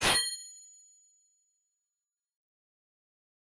Fifth take. Created with the Korg M1 VSTI. 2 oscilators, one playing a cabasa, the other playing a finger cymbal fading in quickly. A chord is played to get this sound. Got a bright texture. It resembles more a katana being pulled from it's holder. Modulated with ring modulation.